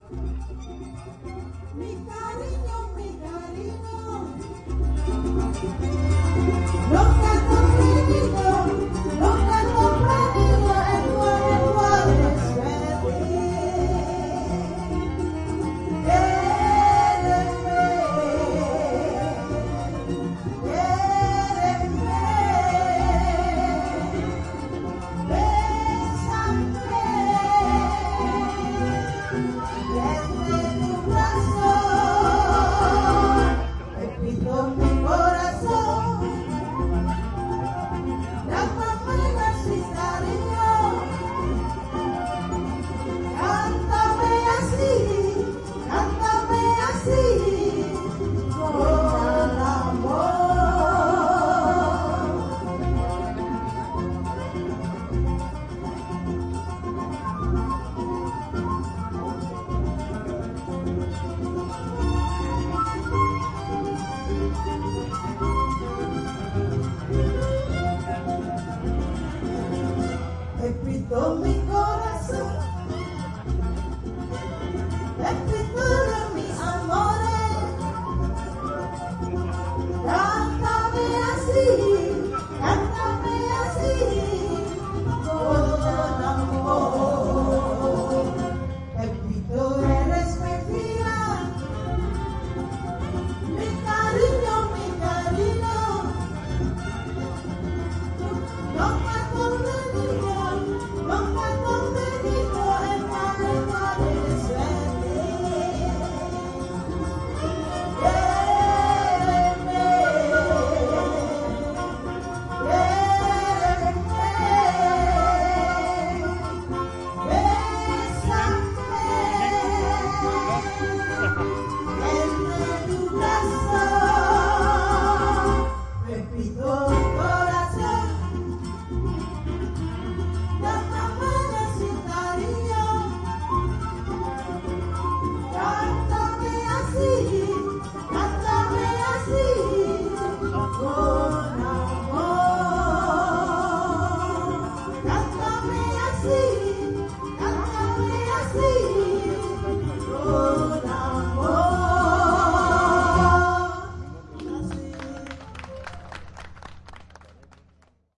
Field-recording excerpt of an evening open-air performance of Campursari type music made within the old walled city (Kraton), Yogyakarta (Jogja), Java, Indonesia. This "oldie" style of music was performed by geriatric musicians for a geriatric crowd and combines Western and Javanese musical instruments and themes.